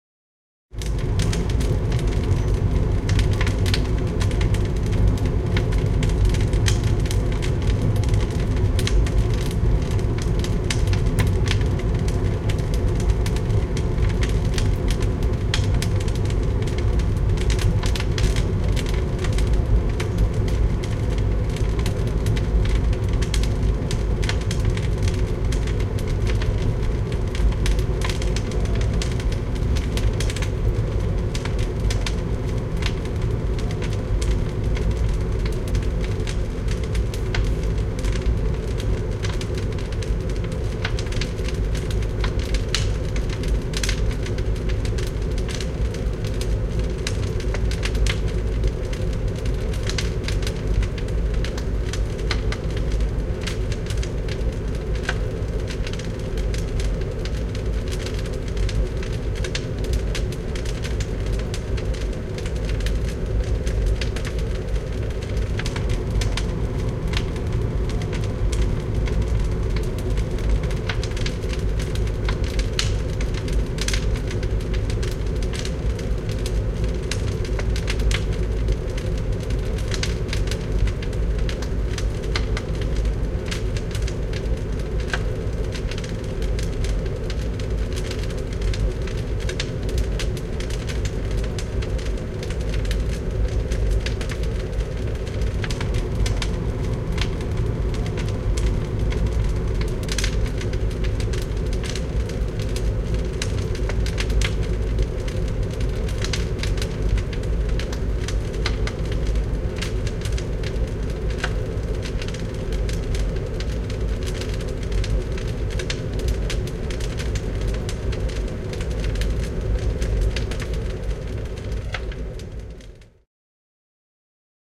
Tuhkaus, arkku palaa, polttouuni / Crematorium, wooden coffin burning in the cremator behind a hatch, fire humming
Krematorio, puinen arkku palaa luukun takana. Tulen huminaa.
Paikka/Place: Suomi / Finland / Helsinki
Aika/Date: 30.09.1997
Hautaus, Liekki, Soundfx, Liekit, Fire, Tehosteet, Crematorium, Tuhkaus, Burial, Cremation, Polttouuni, Tuli, Field-Recording, Flame, Uuni, Arkku, Krematorio, Coffin, Interior, Cremator, Suomi, Flames, Yleisradio